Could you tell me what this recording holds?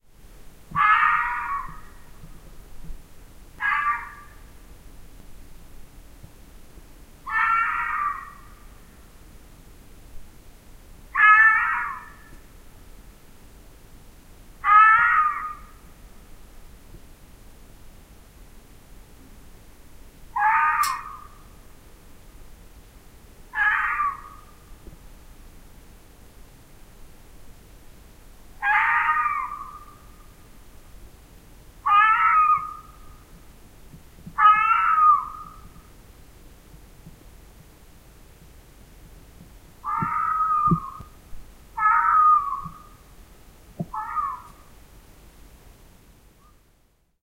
Cri strident et rauque d'un renard, au milieu d'une nuit fraîche de janvier. Saison des amours.
Les commentaires sont aussi les bienvenus :-)
The shrill, hoarse cry of a fox, in the middle of a chilly January night. Love season.
Want to support this sound project?
Many many thanks
animal, cry, field-recording, foret, fox, french, nuit, plainte, renard